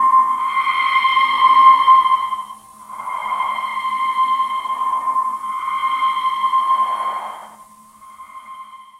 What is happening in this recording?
Ghostly C Note
A recording of a piano playing a C note, paulstretched into an ambient soundscape.
ambient, C, ghost, ghostly, note